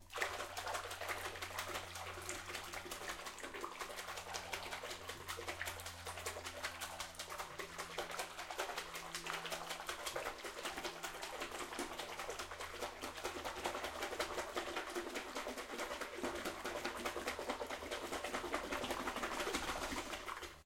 WATRSplsh splashing soapy water in sink TAS H6
Recorded with Zoom H6 with Stereo capsule. Splashing soapy water to create bubbles for washing dishes.
owi, impact, sink, soapy, water, splash